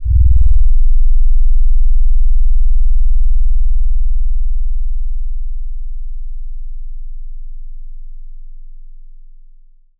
LF bass 2
Playing around with FM synthesis. Low frequency tones. Very low frequency - best heard on a system with a sub-bass. Rendered on SoundForge 7
low-frequency, bass